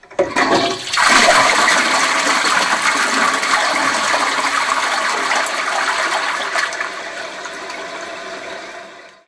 The sound of a norwegian flushing toilet in a small bathroom captured with a spy-mic. How exotic :)